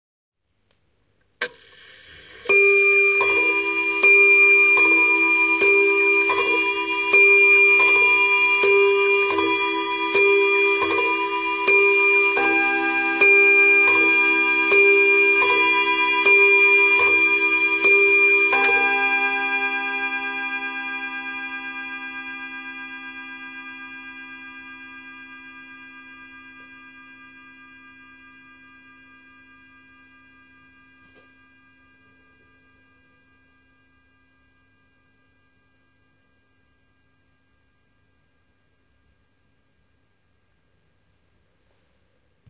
Antique table clock (probably early 20th century) chiming eleven times.
hour, time, clock, o